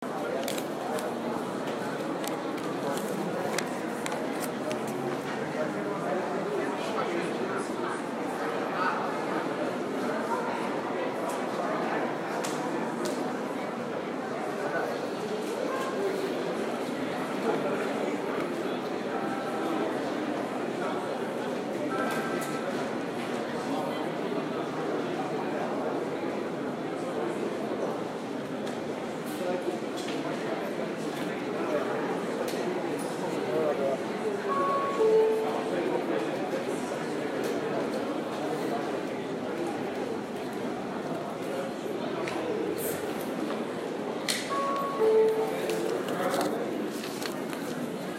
office ambience
People talking in a brazillian registry office.